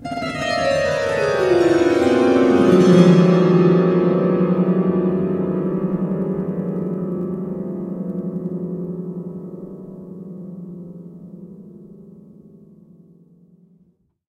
piano harp down 4
Grand piano harp glissando recorded on Logic Pro using a Tascam US-122L and an SM58
pluck, magical, harp, piano, glissando